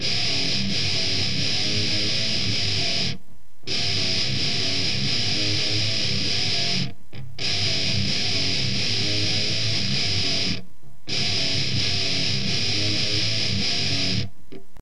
groove loop 7
i think most of thease are 120 bpm not to sure
1, groove, guitar, hardcore, heavy, loops, metal, rock, rythem, rythum, thrash